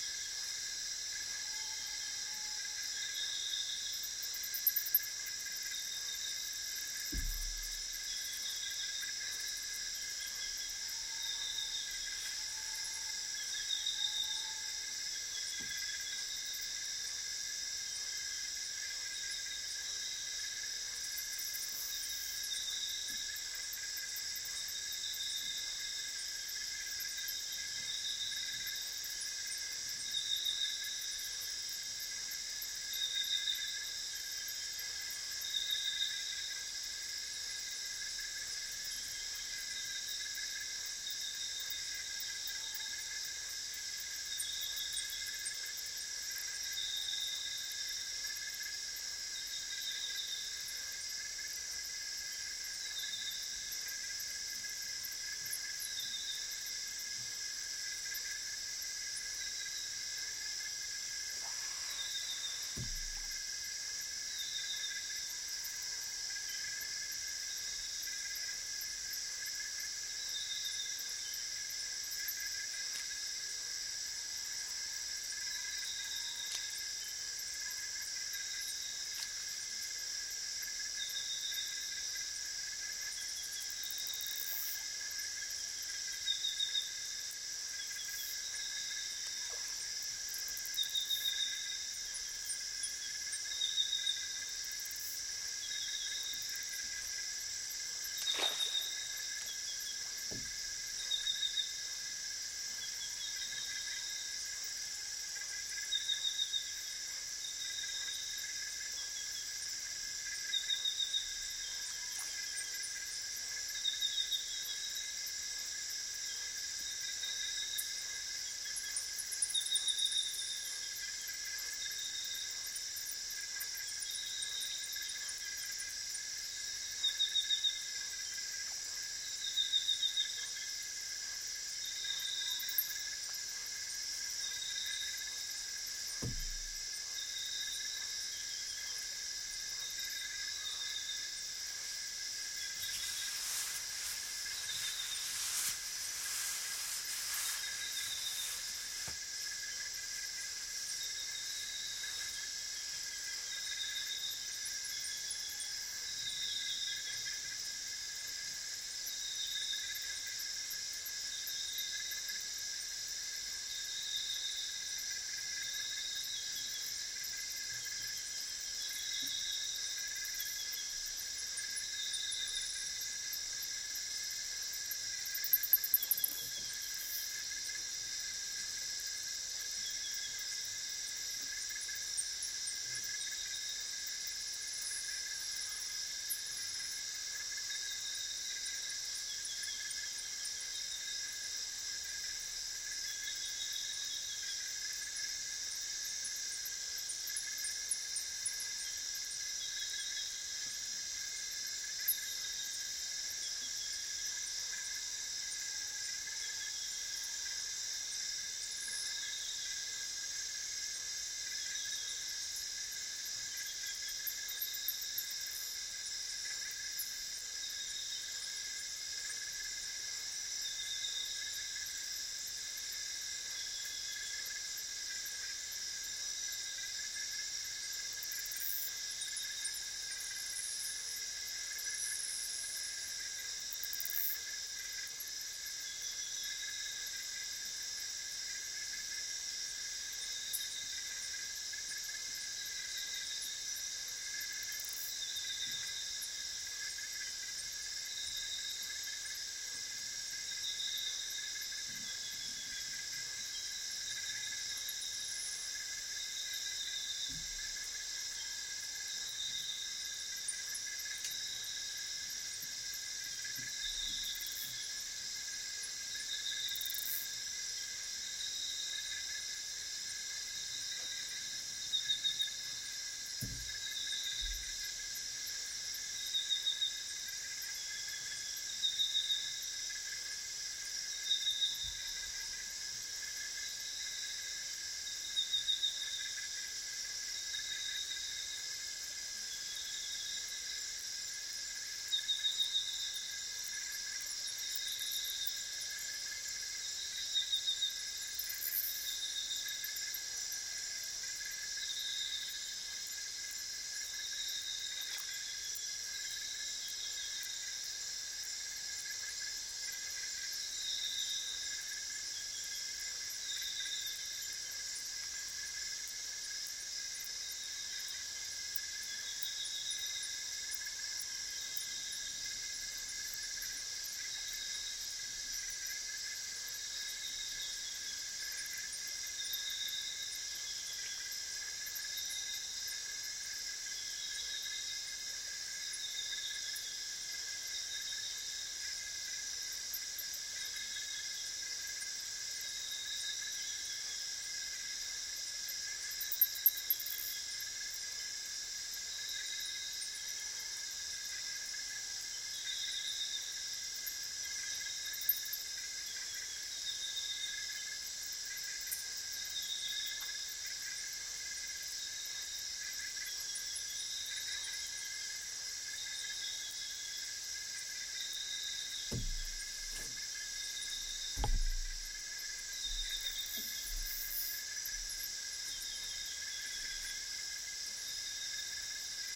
Amazon jungle day crickets birds and frogs from boat on river great spread1
Amazon jungle day crickets birds and frogs from boat on river great spread
Amazon, birds, crickets, day, frogs, jungle